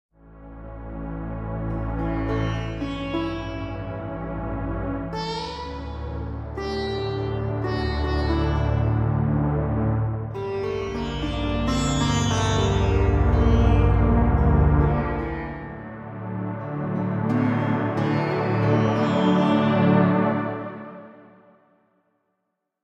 A short meditation theme for games, movies or whatever you want. Enjoy
ambience, peaceful, quiet, samples, smile, vst, xpand